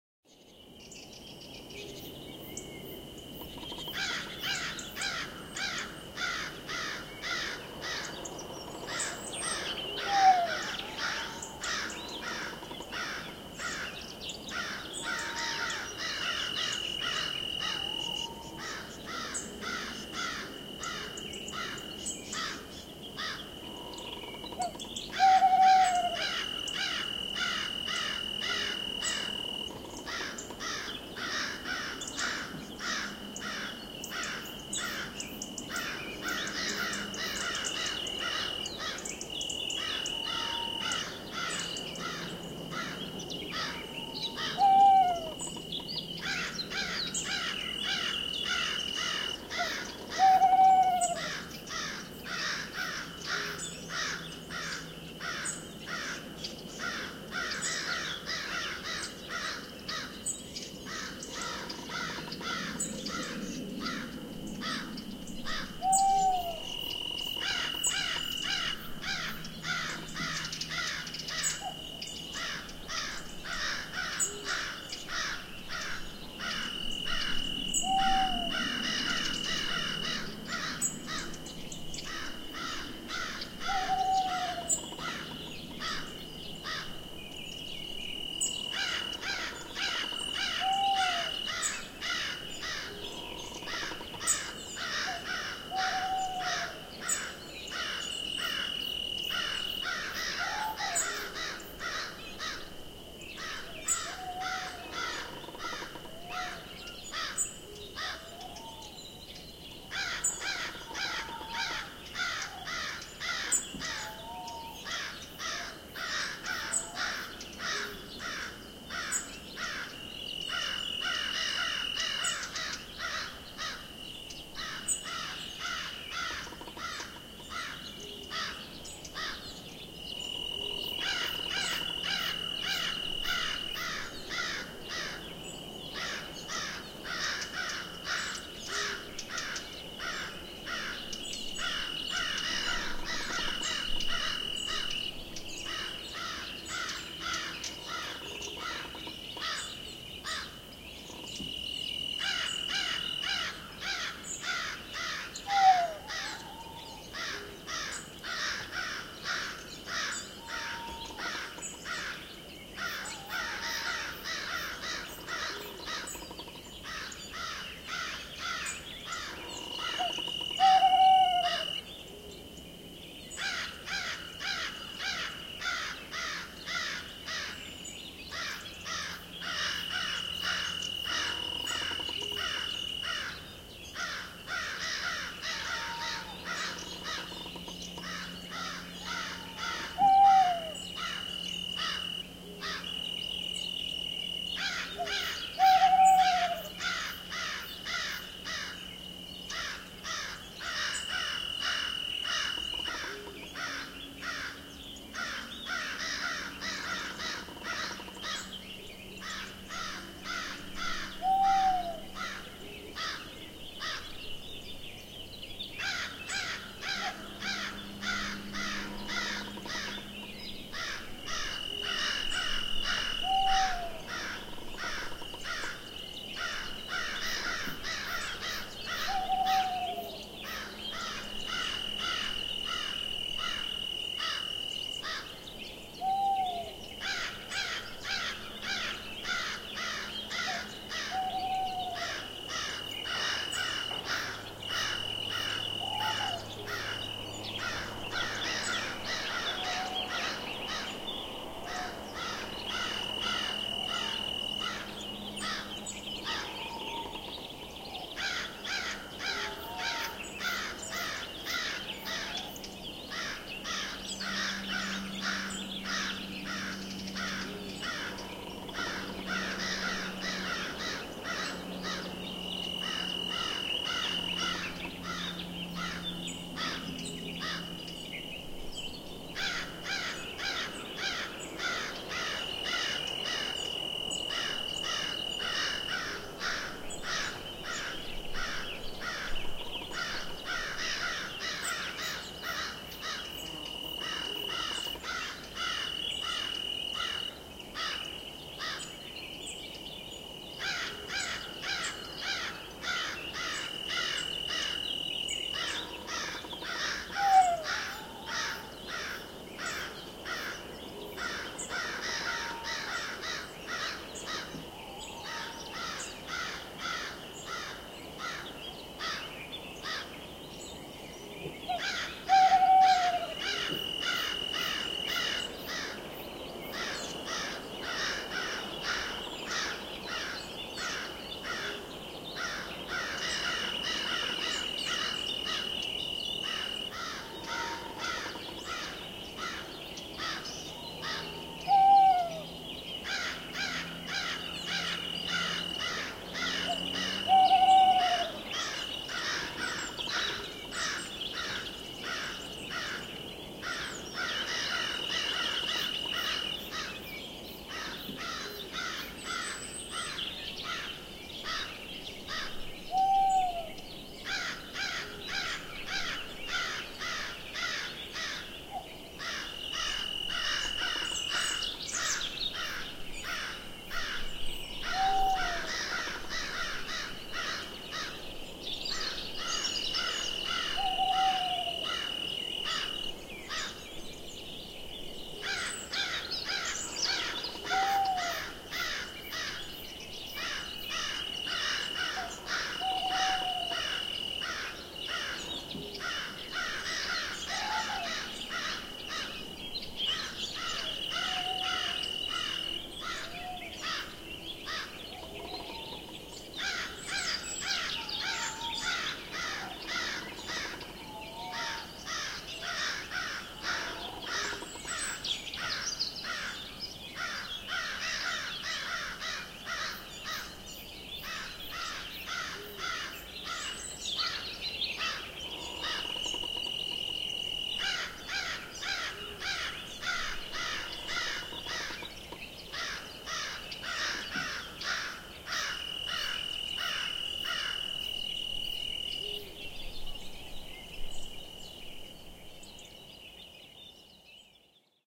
Eerie forest background assembled from various other sounds. Some stereo sounds, some mono. Tweeting birds, crows, hooting owls, crickets, creaking trees.
Uses the following samples:
20071104.forest.04.binaural - dobroide
Crows_01 - Q.K.
creeeeek-GAIN_01 - XxBirdoxX
20060706.night.forest02 - dobroide
20060706.night.cricket - dobroide
Owls - Benboncan
Tawny Owls - Benboncan
ambience, atmosphere, birds, creak, crickets, crows, eerie, forest, hoot, insects, nature, owl, remix, spooky, tree, wood